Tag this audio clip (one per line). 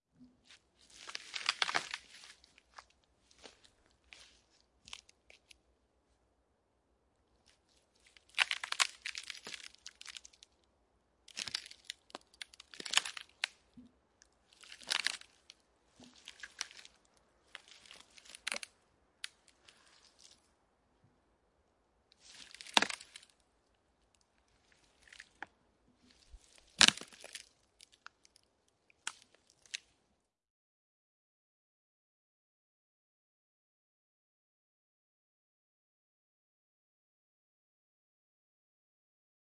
bark
bass
branch
cracking
fallen
leaves
stepping
tree
twig
wood